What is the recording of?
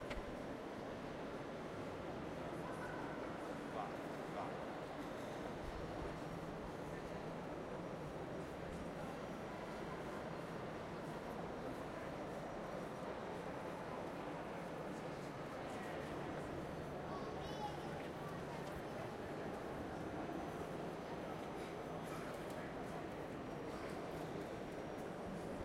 H1 Zoom. Large Tourist crowd inside Cathedral in Barcelona.